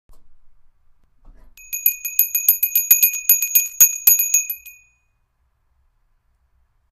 Bell, ringing, ring
Bell, ring